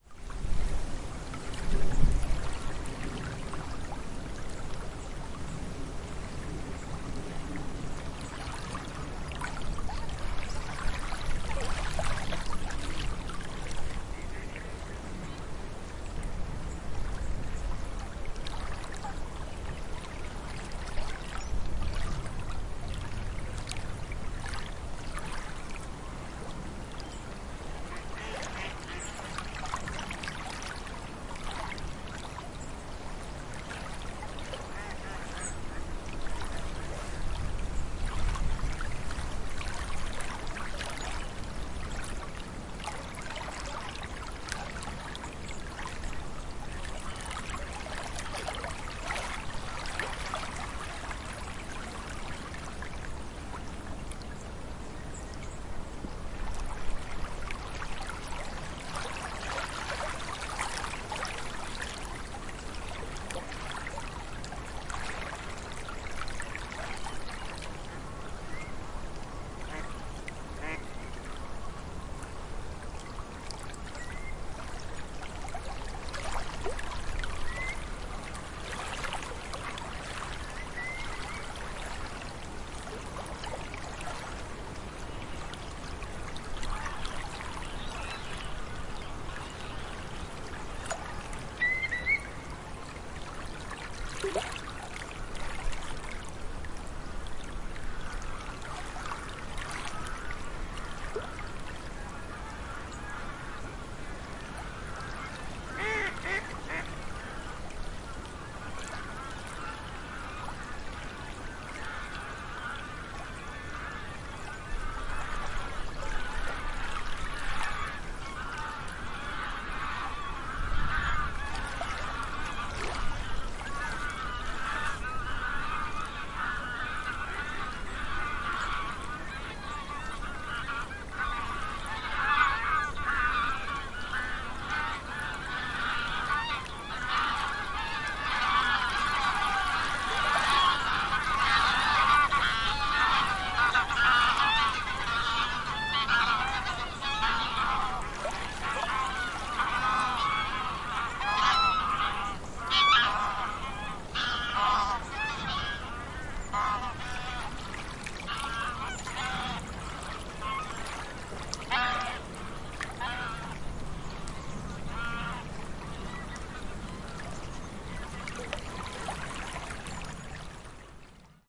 Recorded on the shoreline of the northern section of the Rye Habour Nature Reserve on a Zoom H4N Pro. Less wind noise on this one as I managed to find a sheltered cove. The geese get fairly noisy towards the end!